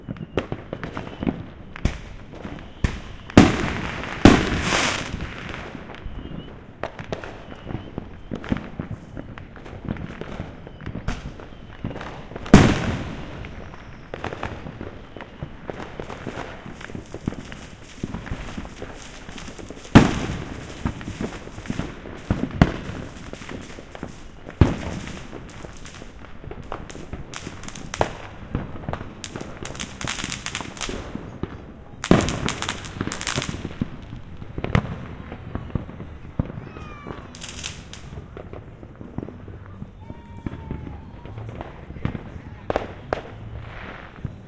Fireworks going off in various places within Santa Ana recorded with Roland CS-10EM Binaural Microphones/Earphones and a Zoom H4n Pro. No Post-processing added.
ambient, bang, binaural, binauralrecording, binauralrecordings, bomb, boom, exploding, explosion, explosions, explosive, fieldrecord, field-recording, fieldrecording, fire-crackers, firecrackers, firework, fire-works, fireworks, fourth-of-july, kaboom, loud, new-year, newyear, newyears, rocket, rockets